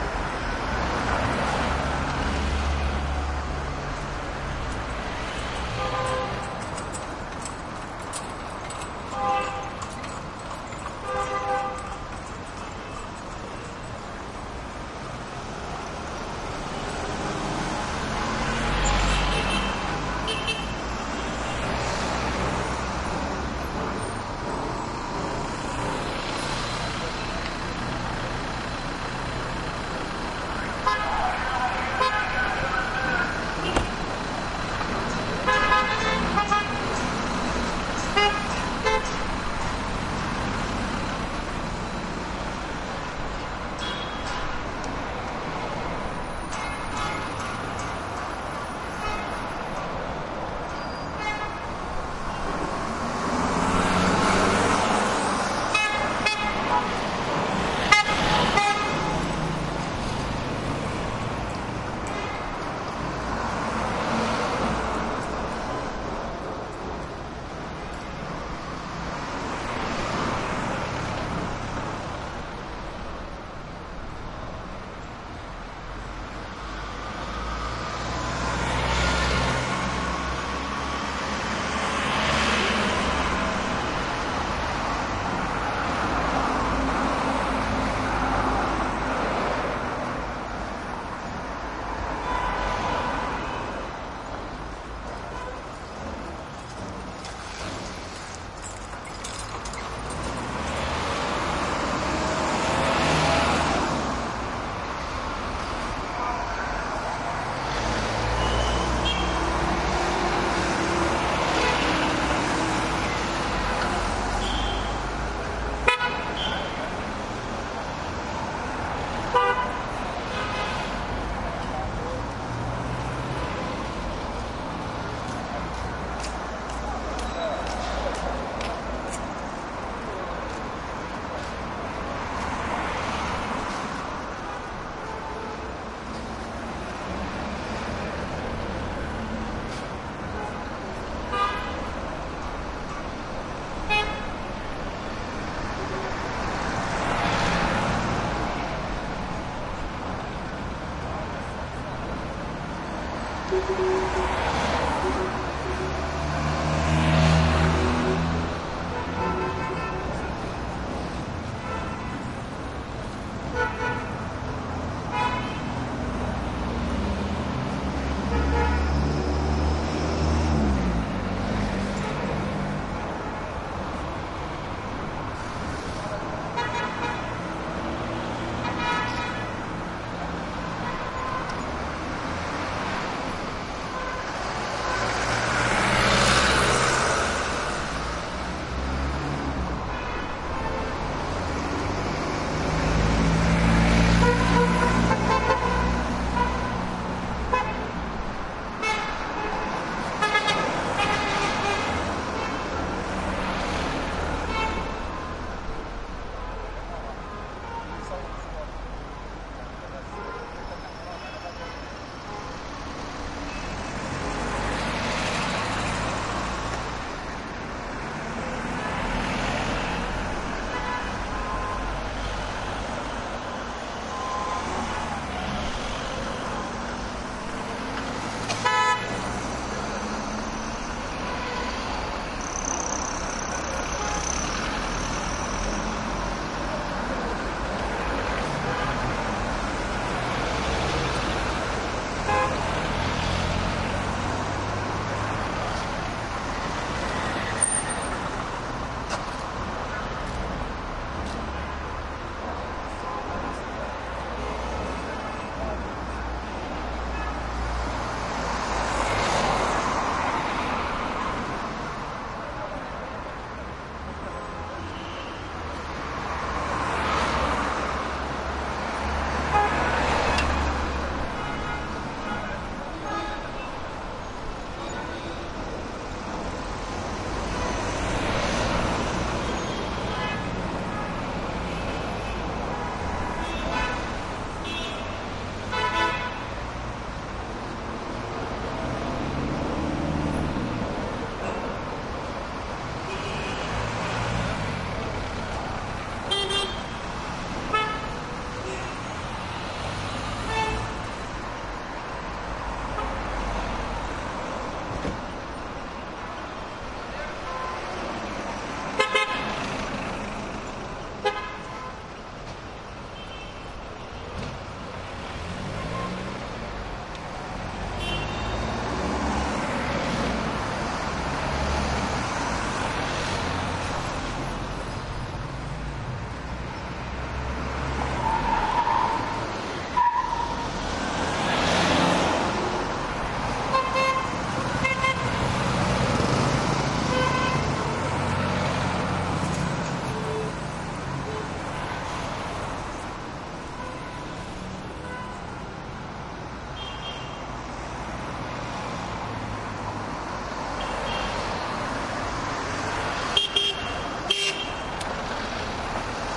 traffic heavy Middle East busy boulevard throaty movement loud horn honks +horse trot pass middle Gaza 2016
boulevard, busy, city, East, heavy, honks, horn, Middle, traffic